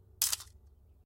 analog camera shutter
The sound of a Canon AE-1 releasing it's shutter
Recorded on Zoom H6 with Sennheiser 416 P48
release, shutter, mechanic, camera, analog, film, snap, analogcamera, photo, whine, charging, photography, charge, old, digital, mechanism, latch, servo, camerashutter, flash, remix, canon